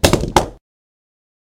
Mag drop:table
A S&W; 9mm Magazine being dropped onto a faux velvet table
Gun, Magazine, Pistol